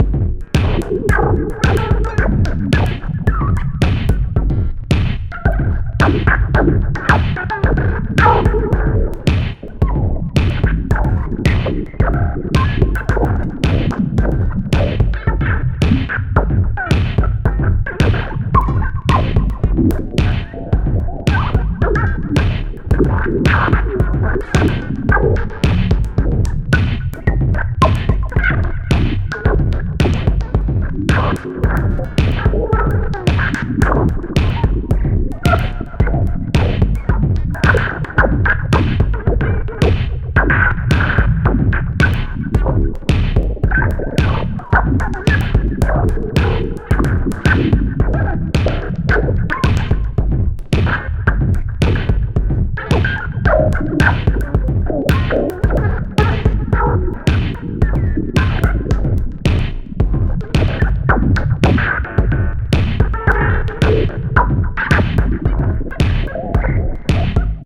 wtfgroove cut
Trashy drumgroove - 31 bars at 110 Bpm with a deranged electronic sequencer created with Reaktor 5
beat, electronic, industrial, sequencer